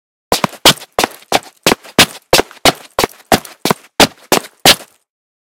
FX Footsteps Stoomtroopers 02
feet, foley, foot, footstep, footsteps, shoe, step, steps, walk, walking